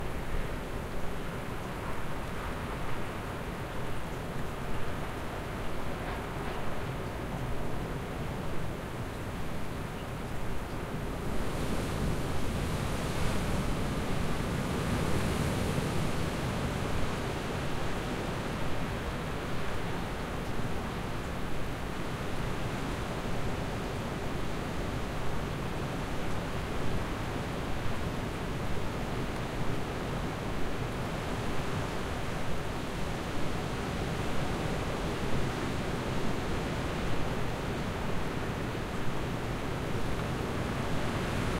Recorded a stormy night in winter
Mic: Zoom H4n Internal Mic
ambiance, ambience, ambient, atmosphere, field-recording, forest, gale, nature, night, rain, spooky, squall, storm, Stormy, tempest, thunder, thunderstorm, trees, turbulence, weather, wind